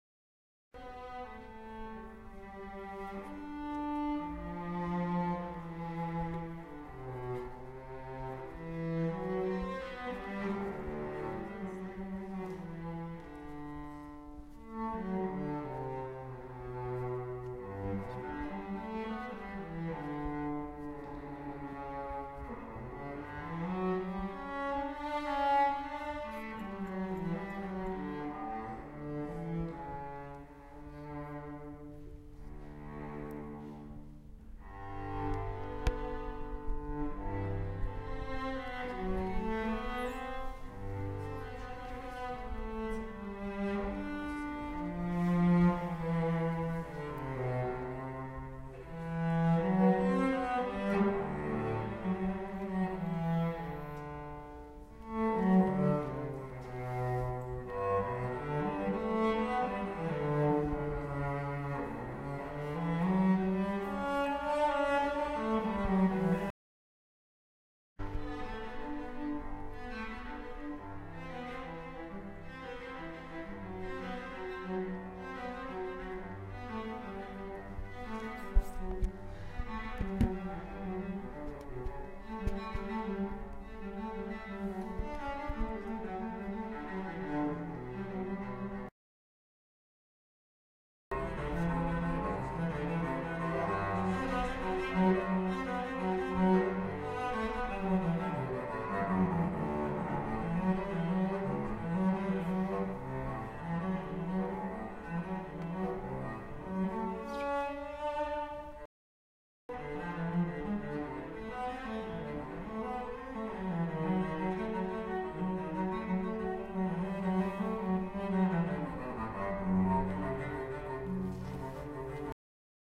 Ambience,Cello,Hall
A cello being played at a large venue
Beautiful, Cello, Large-Hall